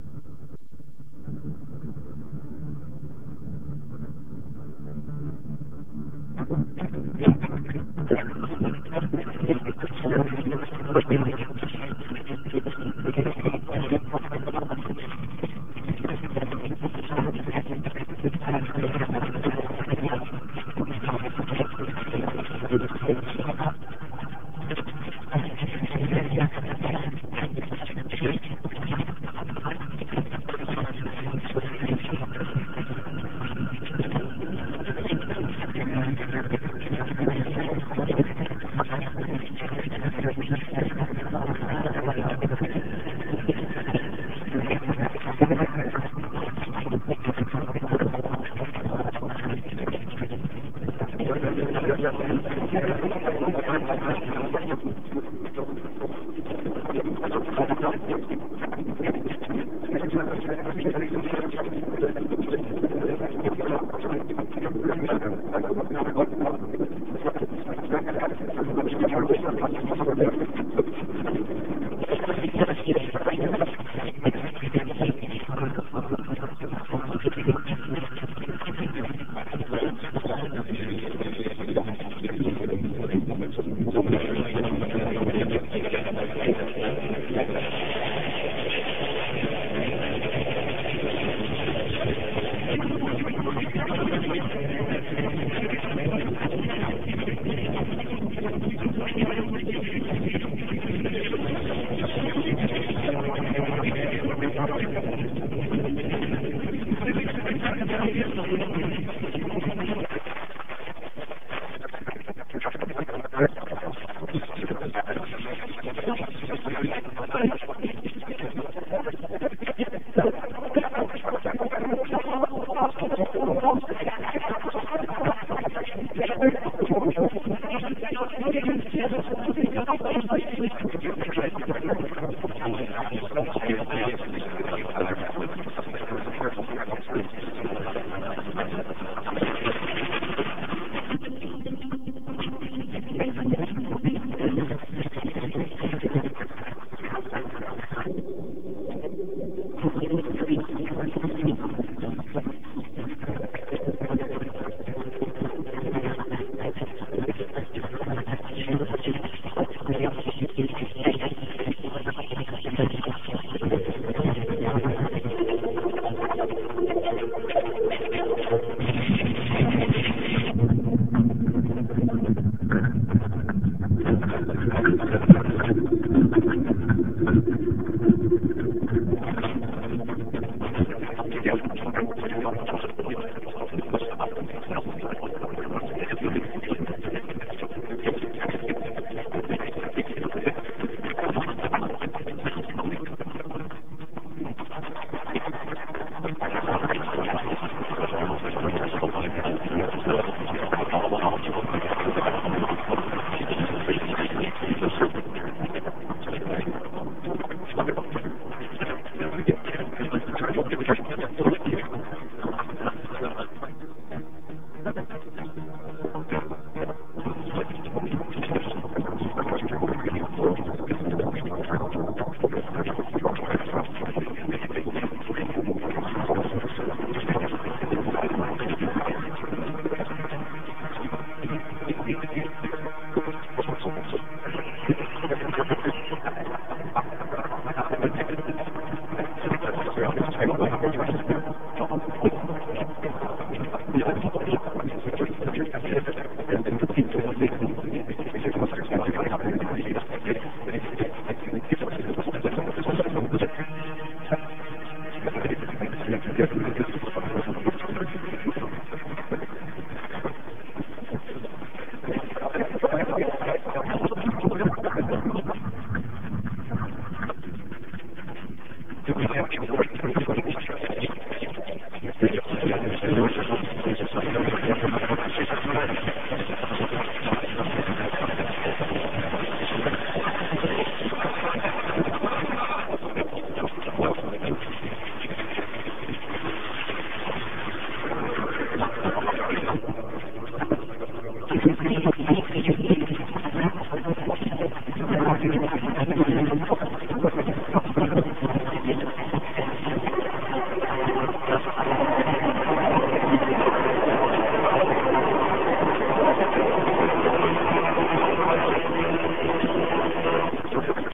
This is a recording of a garbled internet stream. For some reason the decoder didn't get it right in the first time, although I think it got it just right.